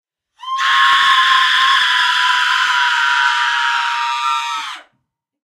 scream group women
3 women standing near 2 different microphones making a scream.Recorded with a SM 58 and a Behringer B1 via an Mbox (panned in Logic Express -30+30) giving a typical stereo sound.
agony, cry, environmental-sounds-research, exaggerated, exaggerating, horror, human, pain, psycho, schreeuw, schrei, scream, screaming, screams, shout, shouting, suspense, vocal, voice, women, yell, yelling